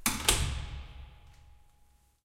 Door closing in a stairwell. Recorded with a Zoom H5 with a XYH-5 stereo mic.